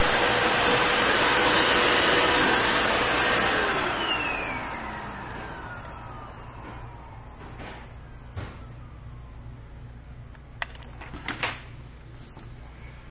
What was to be part of my "Sounds Cats Hate" sample pack, these snippets of a vacuum cleaner are sure to annoy cats, dogs and some birds. Enjoy.